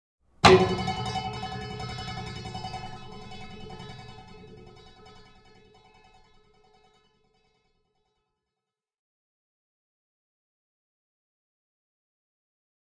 strings, fork, vibration